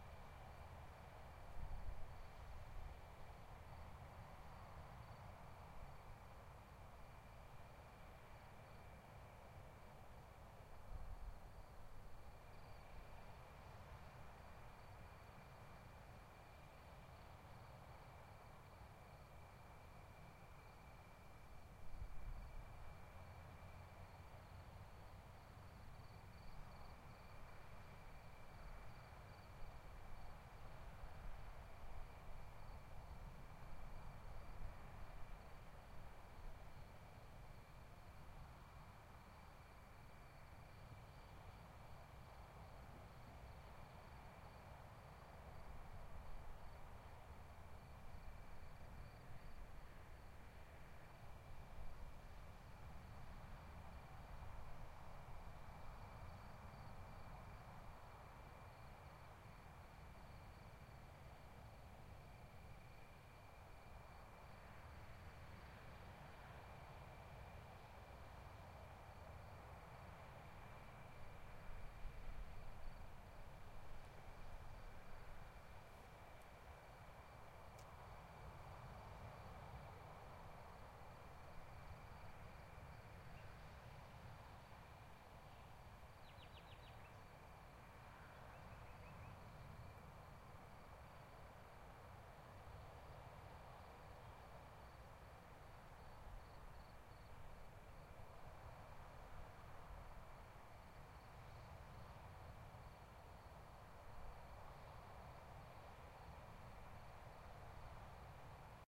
Night by the beach, in Barbate